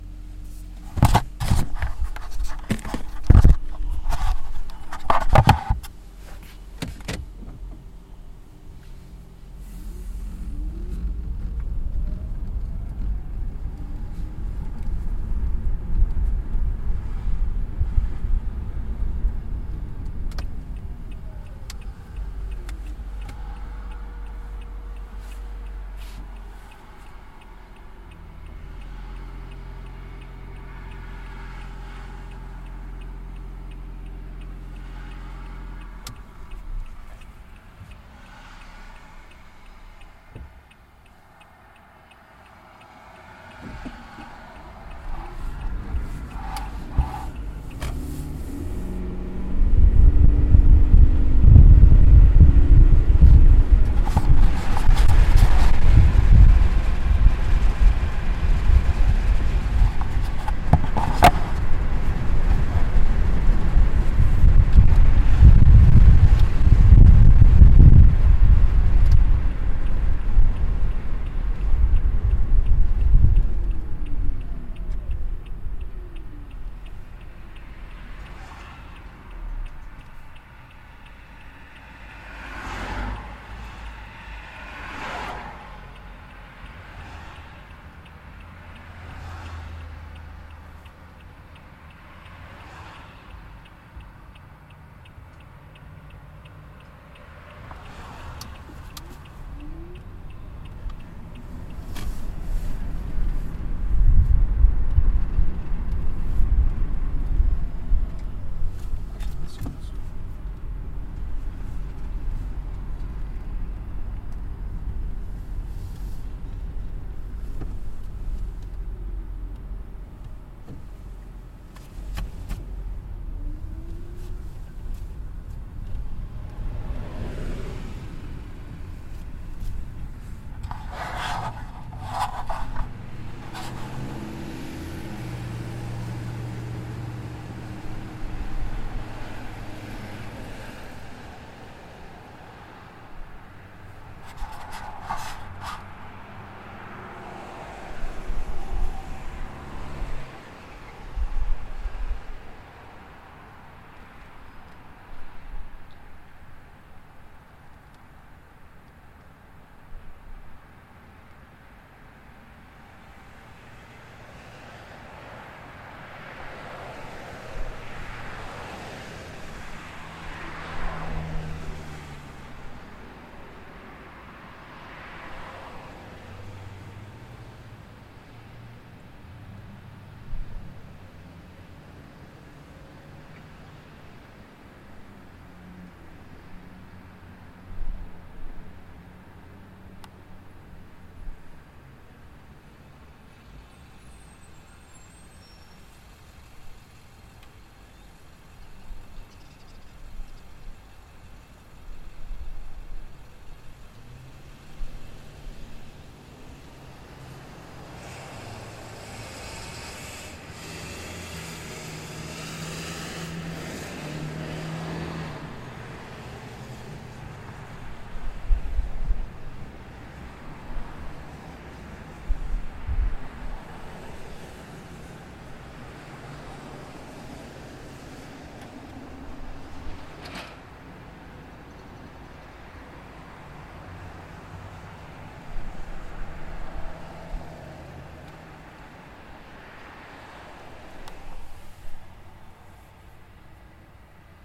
FX RoadNoises
Driving around/parking with a mic pointed out the window, for background for an audio drama. Windows roll up and down.
car, street, road, traffic, field-recording, driving